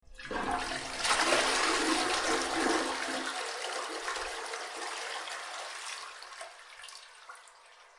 toilet flush
Sound of flushing a toilet.
wc, flushing, bathroom, flush